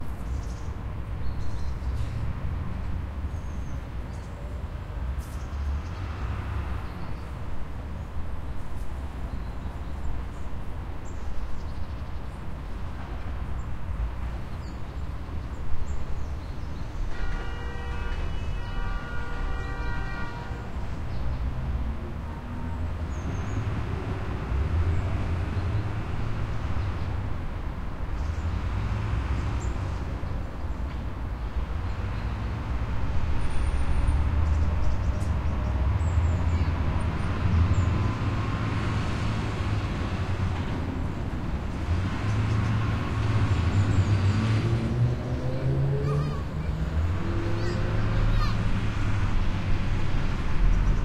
Small city park athmosphere 1
Small city park surrounded by a highway and tramways and one building.
Recorded 2012-09-28 01:15 pm.
AB-stereo
trees, atmosphere, tram, traffic, soundscape, park, people, atmospheric, Russia, 2012, Omsk, cars, background, city, September, saw, hum, residential, atmo, noise, rumble, ambient, suburb, area, autumn, small-park, town, leaves, birds, background-sound